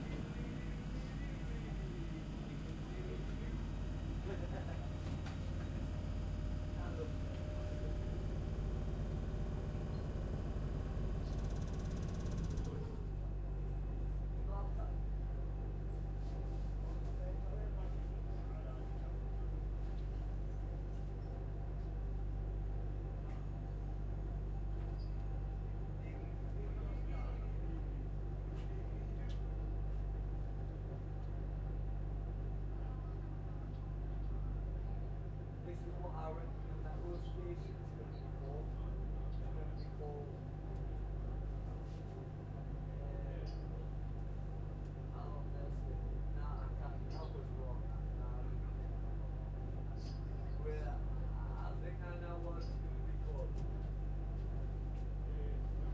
interior train subway metro standing at quiet suburban railway station
field-recording, idling, interior, light-rail, metro, standing, station, stopped, subway, train, travel, travelling, underground, waiting